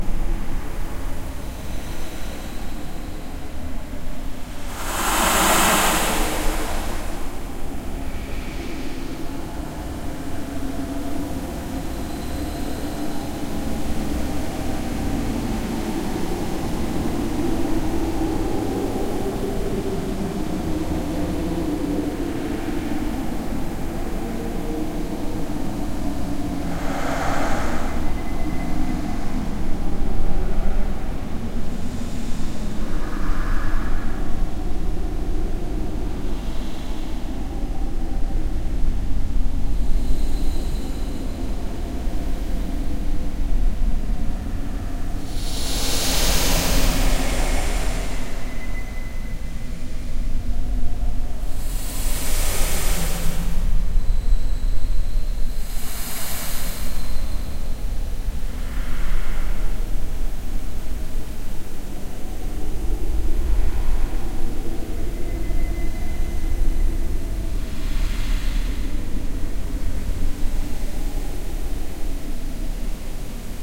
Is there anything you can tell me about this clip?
chilly hell
horror-effects, hell